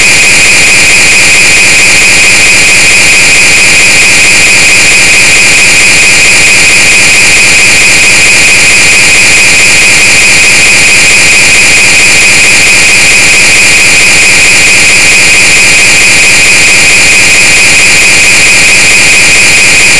made in audacity very loud scream
jumpscare, loud, audacity